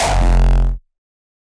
Hardstyle Kick 5
A Hardstyle Kick made of.... i.d.k. anymore possible 10 different Kicks and uncountable layers of distortion :D
Bass, Distortion, Drum, Hard, Hardcore, Hardstyle, Kick, Kickbass, Layer, Layered, Raw, Rawstyle